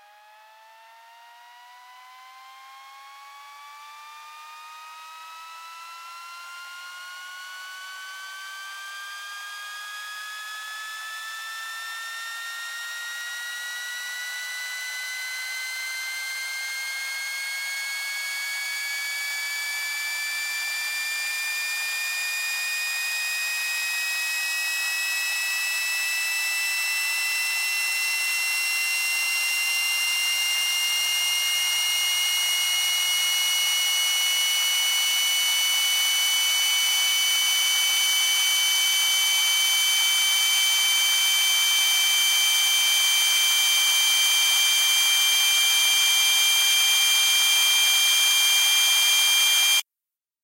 engine spin up
A synthesized sound of an engine powering up with some noise in the background.
charge, charging, engine, jet, power, science, sci-fi, spin, warm